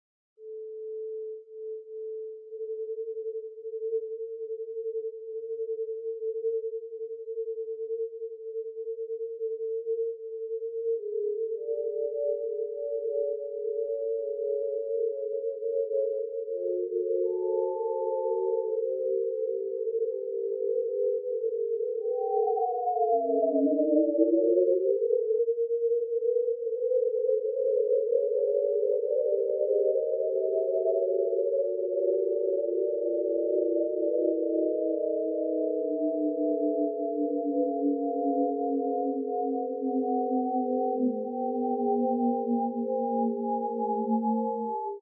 Reversed the image of the depiction of our solar system from the golden records on voyager spacecraft and ran through coagula. Beaming it to space with a flashlight, some tin foil and a magnifying glass as we speak.
golden, image, voyager, synth, record, space